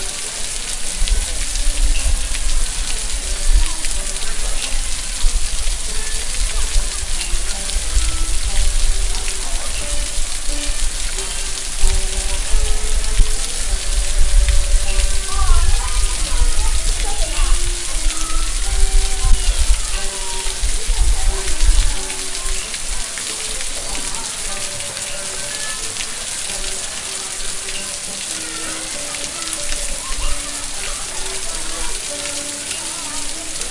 Waterfall at Luis de camoes garden Macau
garden, water, waterfall, Macau